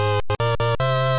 SFX-Clear!
A short 8-bit jingle. You completed the level!
8-Bit, Video-game, Jingle, Pulse, 8Bit, Game, Triangle, High, SFX, NES